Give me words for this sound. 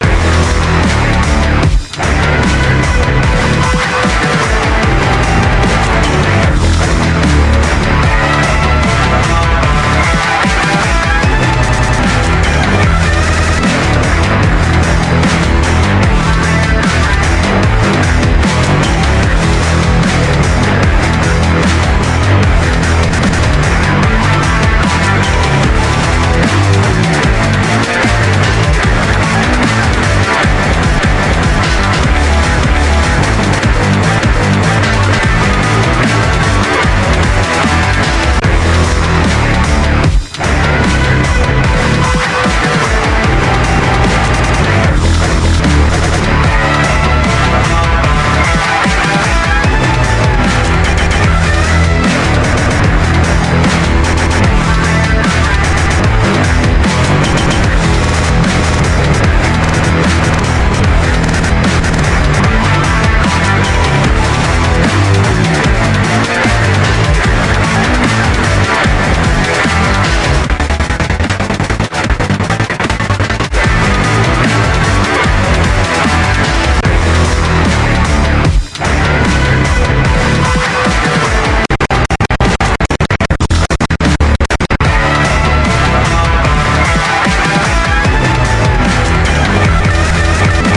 Original Glitch Rock Music Loop.